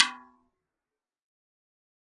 TT08x08-LP-Rm-v07
A 1-shot sample taken of an 8-inch diameter, 8-inch deep tomtom, recorded with an Equitek E100 close-mic and two Peavey electret condenser microphones in an XY pair. The drum was fitted with a Remo coated ambassador head on top and a Remo clear diplomat head on bottom.
Notes for samples in this pack:
Tuning:
VLP = Very Low Pitch
LP = Low Pitch
MLP = Medium-Low Pitch
MP = Medium Pitch
MHP = Medium-High Pitch
HP = High Pitch
VHP = Very High Pitch
Playing style:
Hd = Head Strike
RS = Rimshot (Simultaneous head and rim) Strike
Rm = Rim Strike
1-shot, drum, multisample, tom, velocity